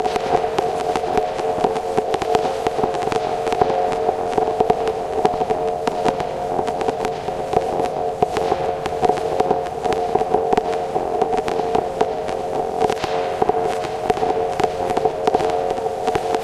An asthmatic drone. It's like fluid in the lungs. Gurgle...snap...crackle...pop!